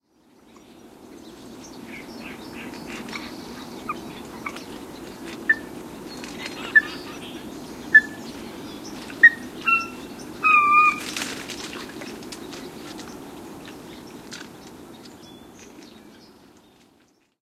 ag22jan2011t11
Recorded January 22nd, 2011, just after sunset.